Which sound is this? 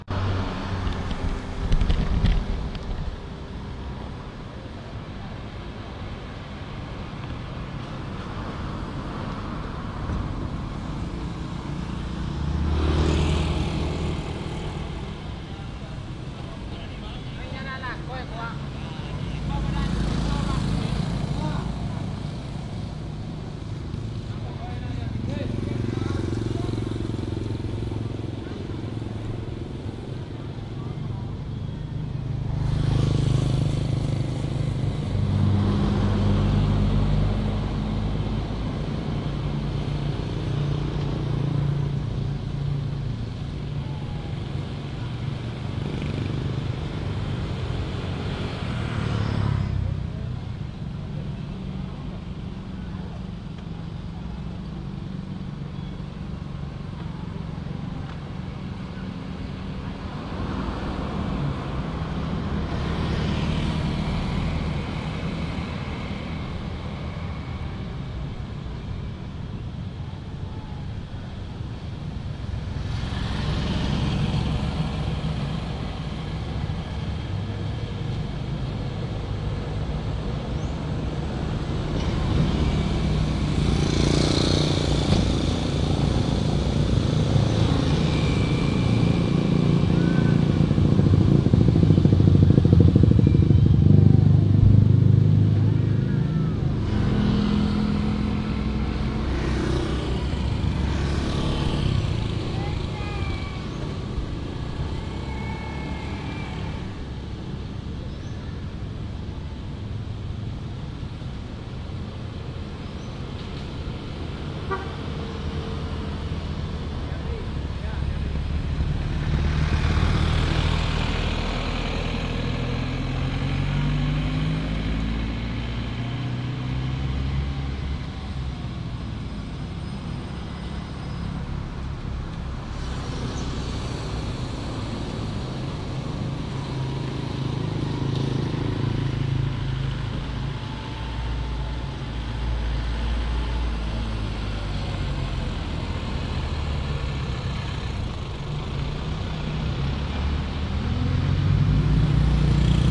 Street Sound Day #2
Street general noise of car and bikes passing by, recorded with Rode Videomic Pro on a normal mid day.
ambience, ambient, atmosphere, cars, day, field-recording, sound, soundscape, street, traffic